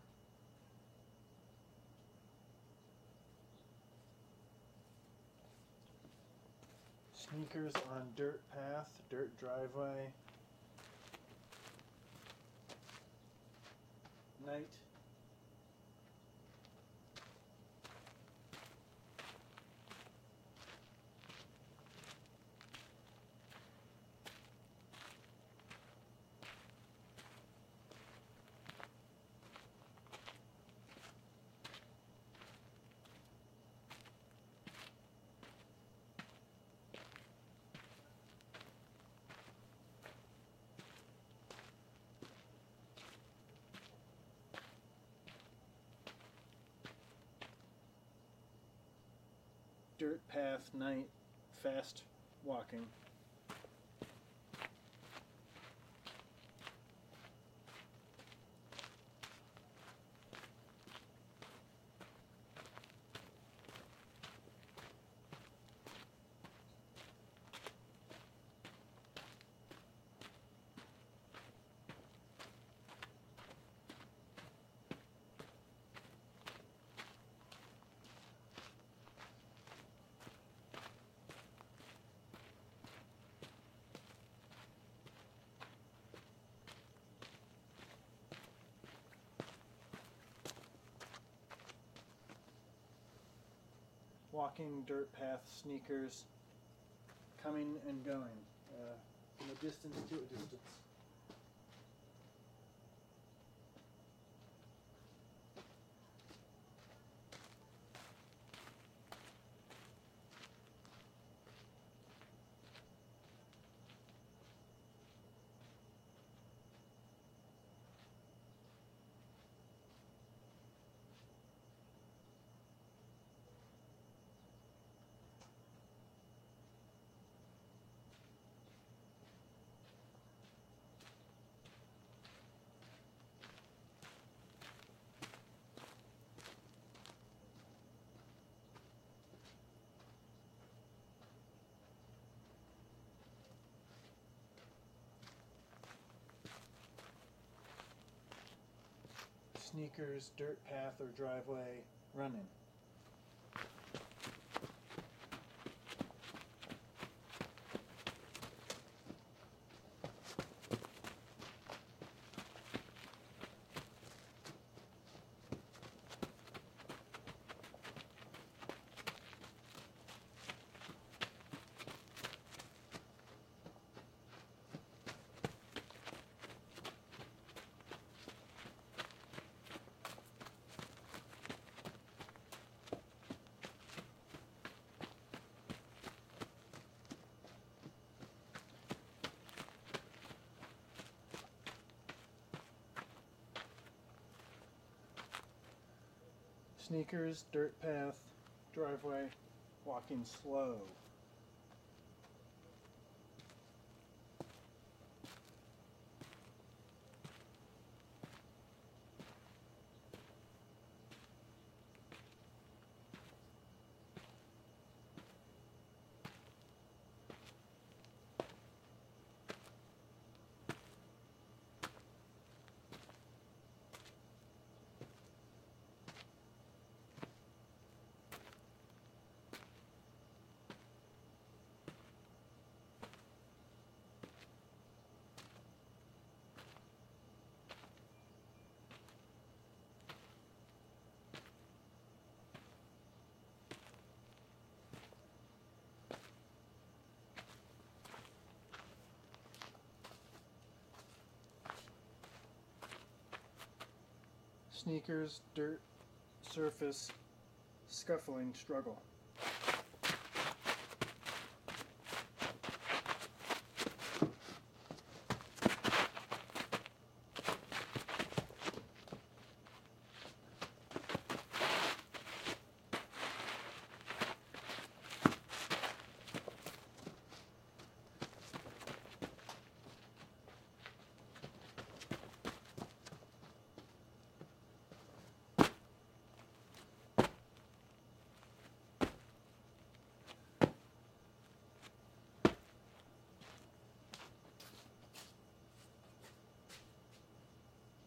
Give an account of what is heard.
Sneakers onDirt Path:Driveway
Sneakers walking on hard packed dirt. Different speeds. As well as shuffling and sckufling sounds. Some minor background noise